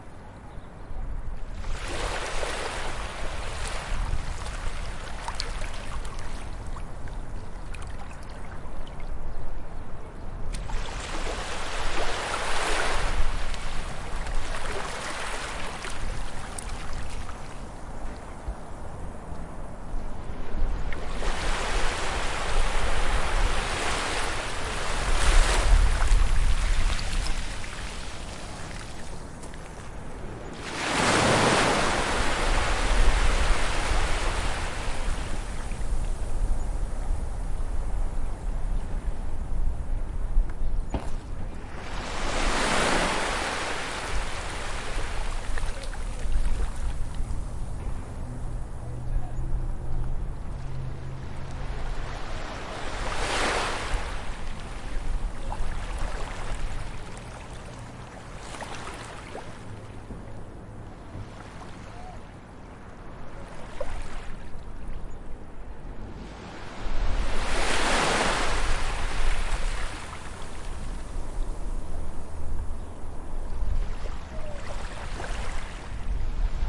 Ocean sounds on the East coast.